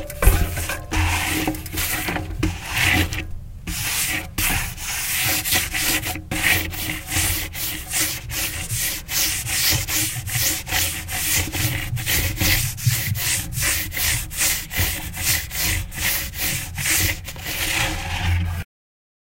Hand on Bike Tire
Hand touching a spinning bike tire
bicycle, bike, hand, spinning, spinning-wheel, wheel, whirr